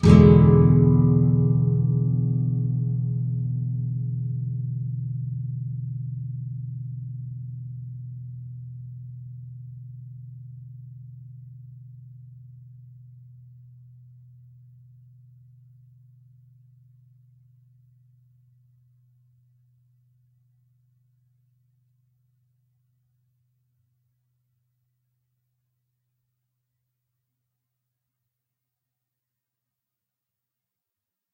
Standard open E 7th chord. The same as E Major except the D (4th) string which is open. Down strum. If any of these samples have any errors or faults, please tell me.
guitar, open-chords